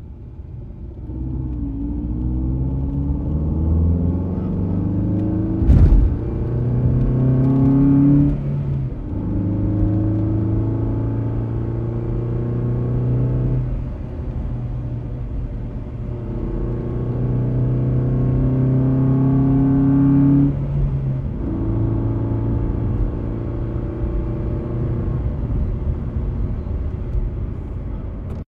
Car Acceleration Inside Car
A recording of me driving recorded inside the car. Recorded with a Tascam Dr100 and a Behringer C4 Microphone
Acceleration, Car, Cars, Driving, Engine, Engine-noise, In-car, Inside, Muffled